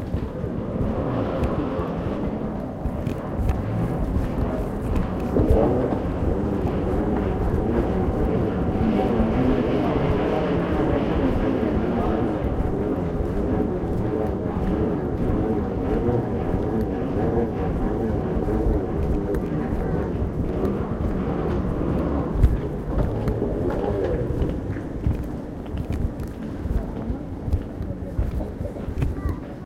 bag on travelator
Sound of a suitcase's wheels rolling on a while walking on a travellator (one of those conveyor-belts for people) at the airport.
Recorded with a Zoom H1 built-in mics.
travellator, cabin-luggage, conveyor-bel, travel-bag, rolling, travel, luggage, hand-luggage, wheel, suitcase, bag, airport, Zoom-H1